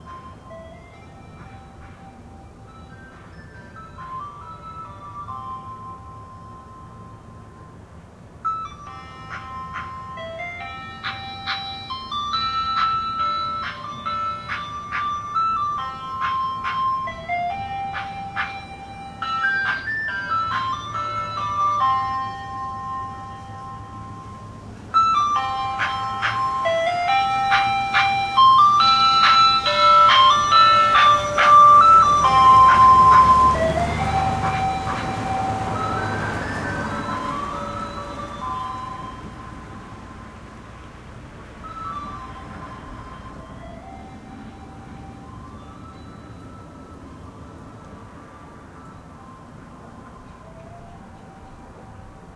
Ice Cream Man
An Ice Cream Truck driving through the neighborhood with it's music playing to attract the attention of children.